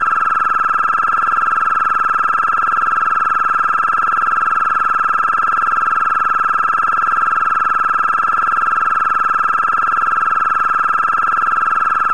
- Effect->Change Speed
Speed Multiplier: 1.700
Percent Change: 70.0000
else use steps below:
AUDACITY
For left channel:
- Cut silence before (0.000s to 0.046s), middle (0.096 to 0.228), and after (0.301 to 0.449) sound
- Cut middle part 0.130 to 0.600
- Effect→Change Speed
Speed Multiplier: 0.800
Percent Change: –20.000
- Effect→Repeat…
Number of repeats add: 250
- Effect→Equalization
(18 dB; 20 Hz)
(18 dB; 800 Hz)
(–18 dB; 2000 Hz
(–26 dB; 11 000 Hz)
For right channel:
- Tracks→Add New→Mono Track
- Copy left track and paste at 0.010 s
Both left and right tracks
- Effect->Change Speed
Speed Multiplier: 1.700
Percent Change: 70.0000